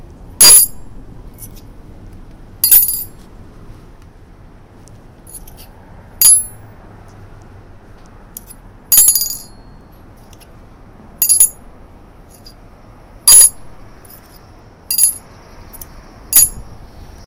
Metal bottle opener dropping on slate stone - outdoor ambi
Repeated drops of a small metal bottle opener onto the slate stone top of my balcony edge. Some Brooklyn street ambi audible.